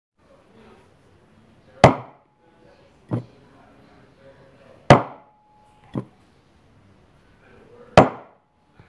Bowl Put Down On Table
Ceramic bowl hitting table as it's put down.
Can also use as Meat Cleaver hitting wooden chopping board.
Recorded using Hi-Q app by Audiophile on Samsung Galaxy S7